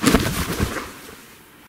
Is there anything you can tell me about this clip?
Body falling on the ground 2
When somebody gets hit